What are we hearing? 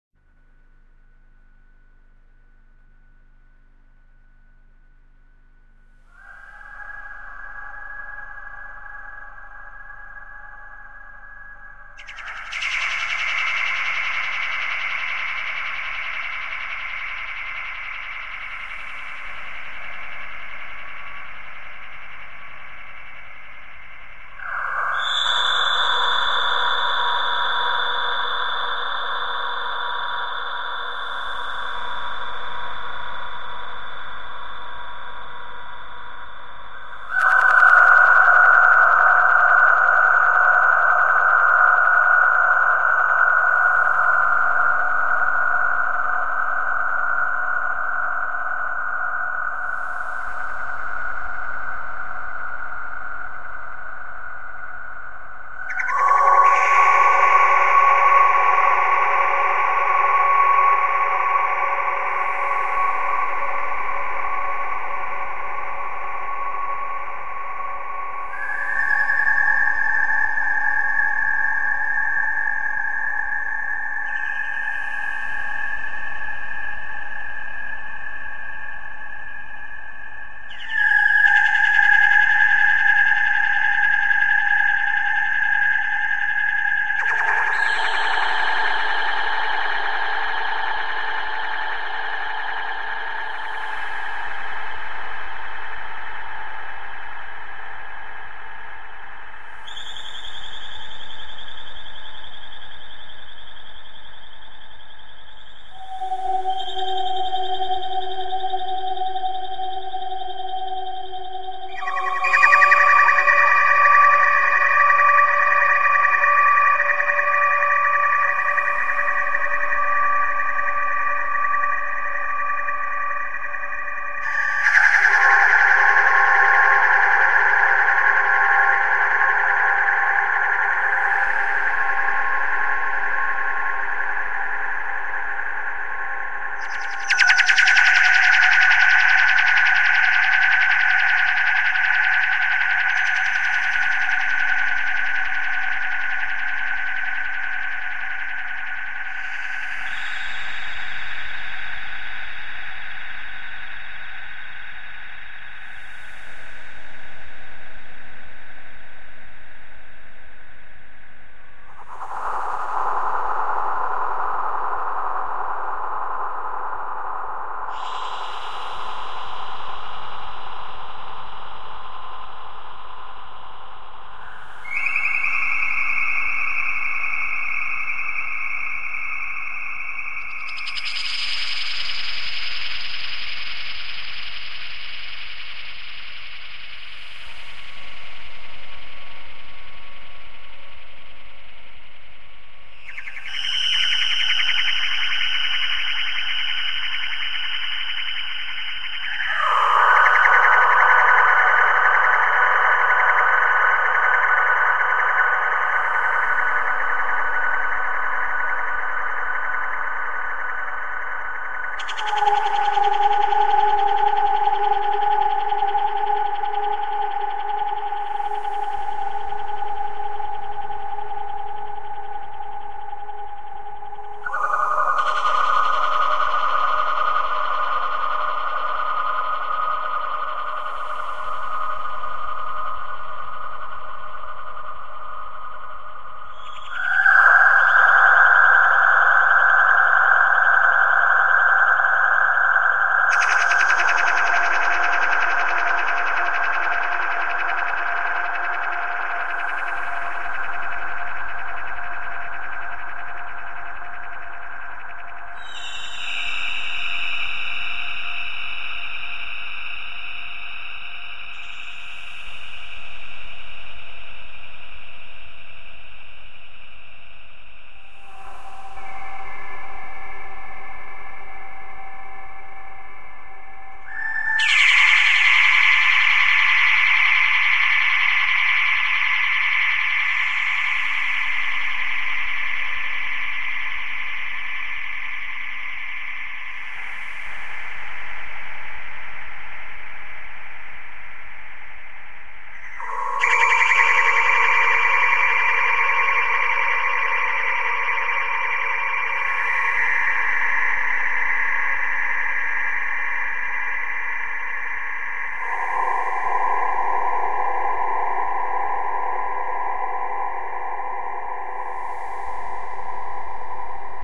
another remix of reinsamba's nightingale2 track made for Slug (37,2° C): granulator, delay, reverb, pitcher, loudness maximizer, compressor. take care, it can be loud at times